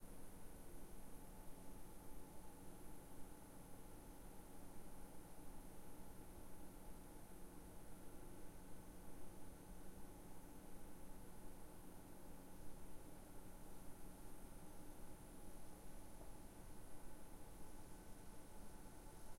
technic room scanner ambience
technic room scanner roomtone
ambience, noise, room, roomtone, scanner, technic